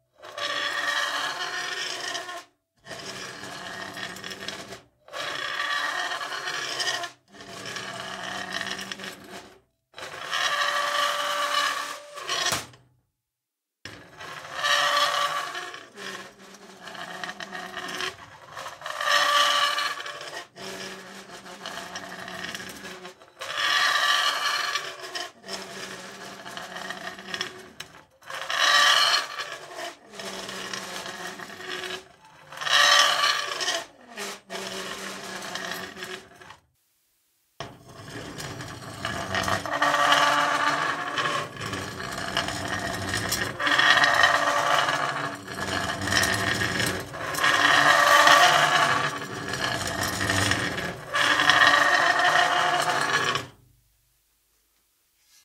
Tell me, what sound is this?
METAL SFX & FOLEY, Long Screeching metal scrapes on stone
Some metal based sounds that we have recorded in the Digital Mixes studio in North Thailand that we are preparing for our sound database but thought we would share them with everyone. Hope you like them and find them useful.
Alex, Boyesen, Digital, Ed, long, METAL, Mixes, scrapes, screeching, Sheffield, stone